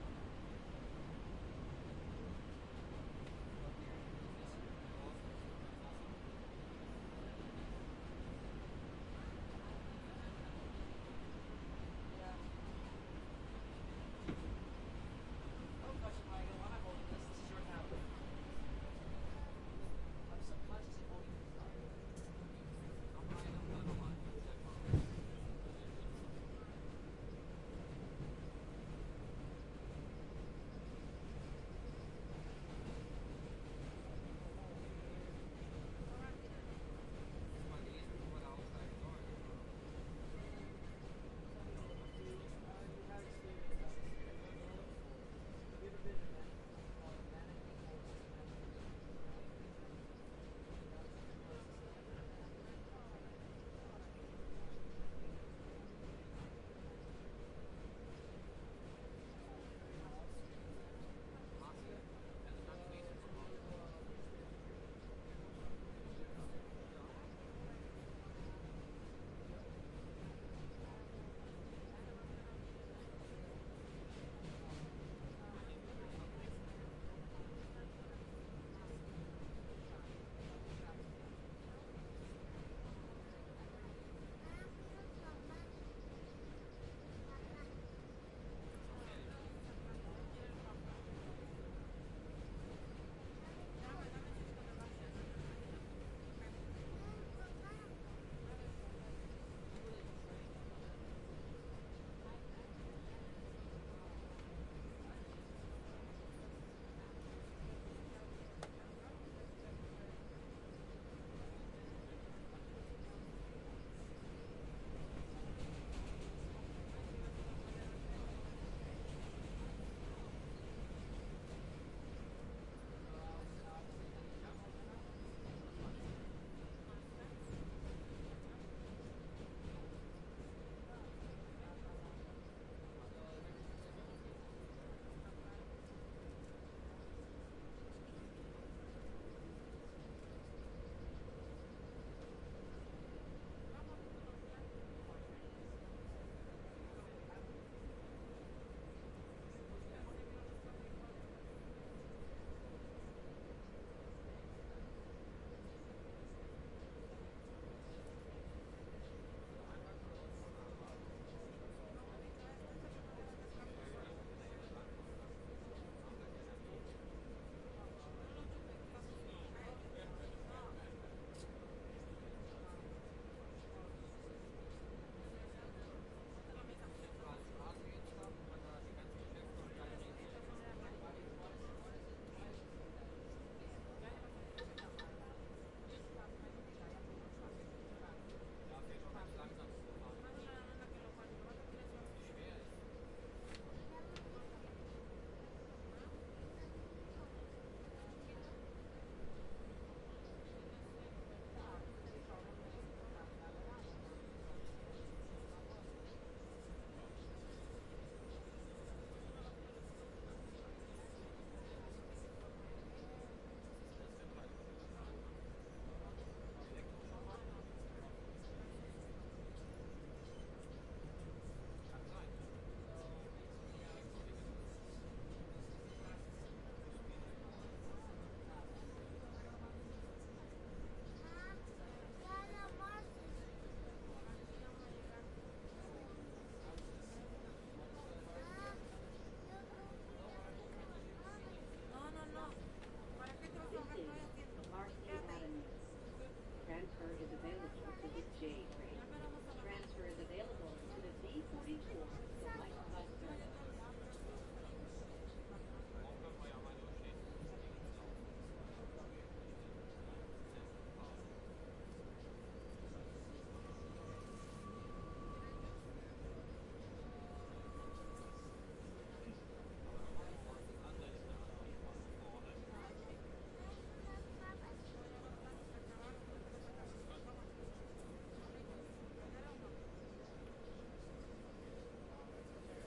Riding the M train in NYC from Essex-Delancey to Hewes St.